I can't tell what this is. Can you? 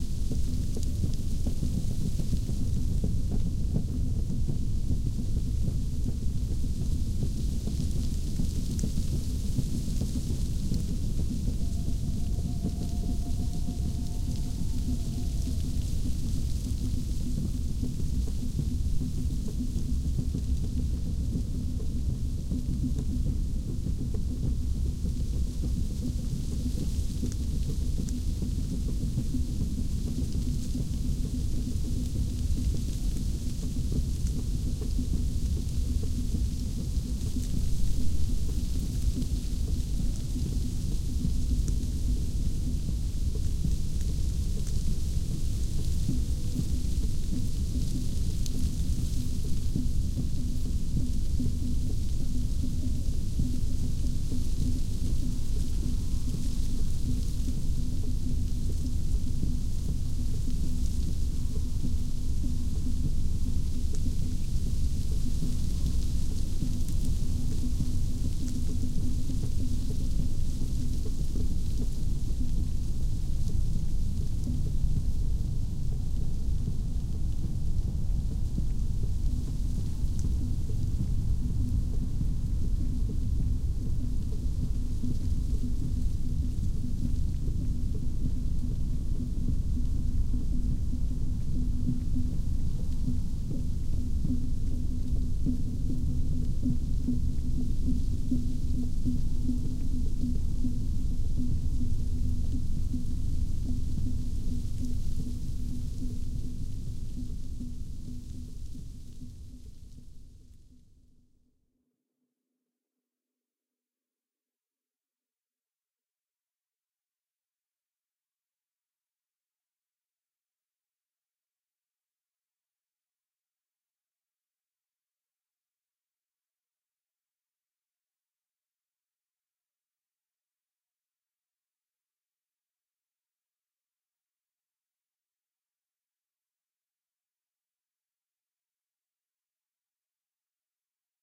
I used this sound in a play for which I was doing sound design. It's meant to sound like a forest at night with a tribal village not far. It's the setting for a wolf hunting.
forest, village